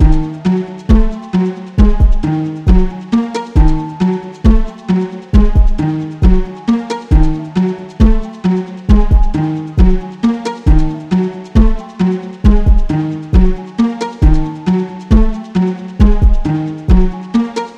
Enjoy and feedback is appreciated!
Created on Fl Studio > Nexus, Gladiator, with a simple kick and a delay.